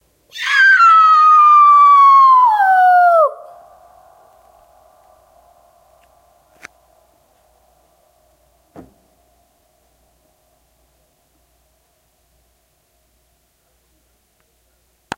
Horror Scream Echo
Made by me screaming into the piano while holding down the pedal, which makes the notes vibrate in tune with the voice. The scream is a little weird because I couldn't do it very realistically. :-) Recorded with a black Sony digital IC voice recorder.
echo, afraid, terror, scared, eerie, horror, scream, scary